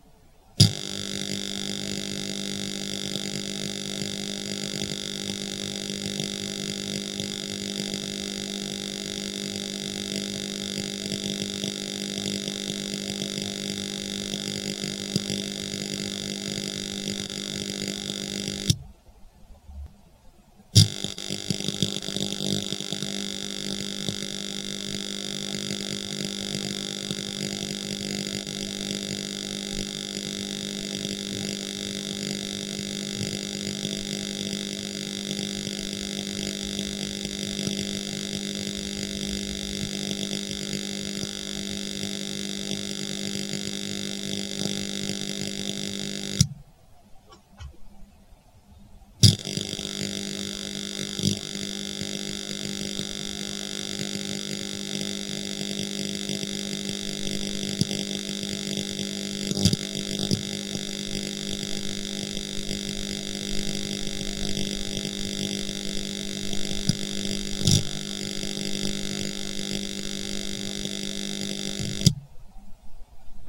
An Arc Welder, zapping.